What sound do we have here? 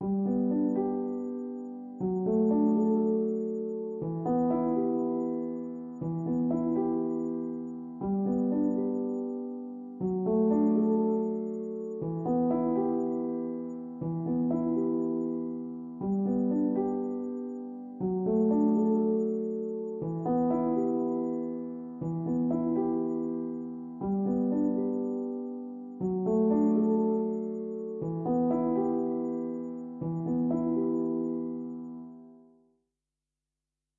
Piano loops 034 octave down short loop 120 bpm
120, 120bpm, bpm, free, loop, Piano, reverb, samples, simple, simplesamples